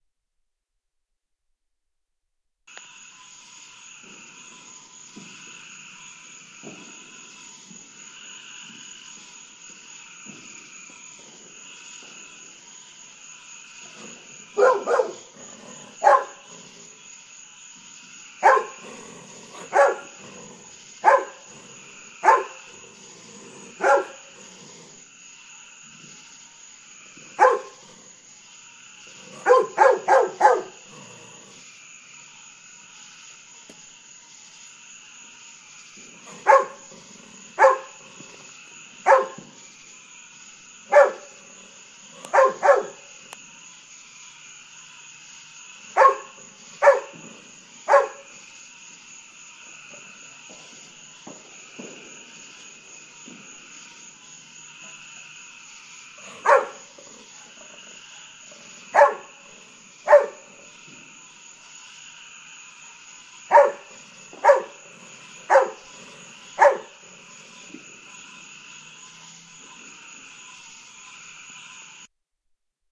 Golden Retriever "Bud" barks at nighttime fireworks
My Golden Retriever Bud, barks at fireworks on the 4th of July, 2015 (about 10:45pm).
Recorded in Southeast U.S.